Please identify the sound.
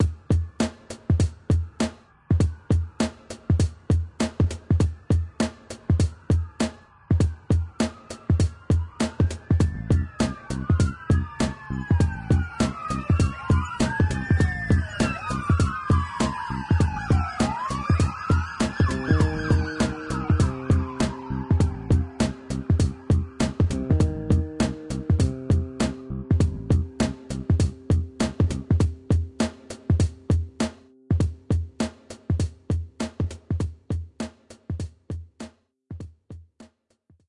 Sample was imported into Reason, added drums and bass to it. Not very good but a bit of fun :o)